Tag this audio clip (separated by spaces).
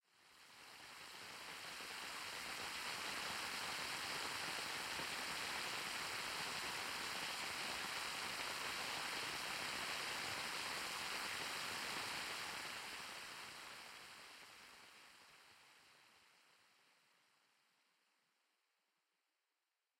noise rain raining synthetic